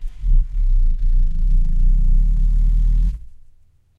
recordings of various rustling sounds with a stereo Audio Technica 853A